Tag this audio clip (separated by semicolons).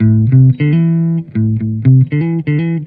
guitar; loop